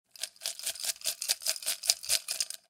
water - ice - glass cup - shaking ice (low cut at 196hz) 01
Shaking a glass cup with ice in it. The recording was low cut at 196hz to remove wind noise caused by shaking the glass.
shaken, shake, cold, ice, cup, glass-cup, dish, ice-cubes, ice-cube, glass, shook, shaking, shaker, rattling